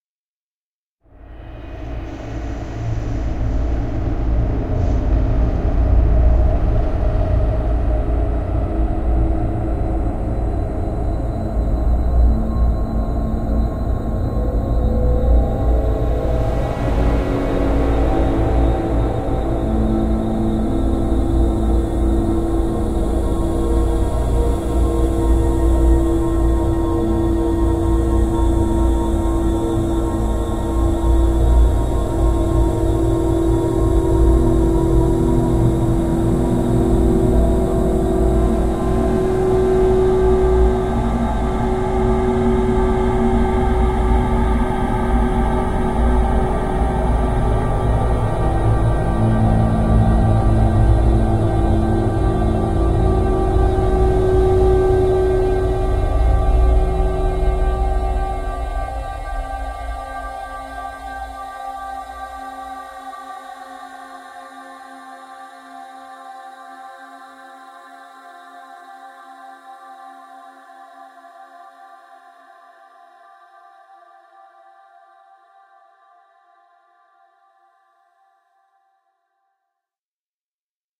HORROR SOUNDSCAPE 1
Thriller ambience made using Cubase Pro.
ambience, creepy, film, fx, horror, scary, spooky, suspense, theatre, thrill